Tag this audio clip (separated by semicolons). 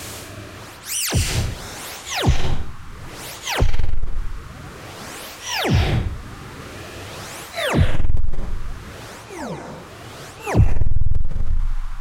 Alien; Gun; Impact; Laser; Outer; Phaser; Sci-Fi; Shoot; Space; Spaceship; Weapon; Weird